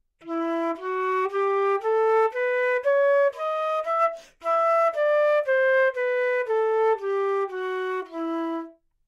Part of the Good-sounds dataset of monophonic instrumental sounds.
instrument::flute
note::E
good-sounds-id::6965
mode::natural minor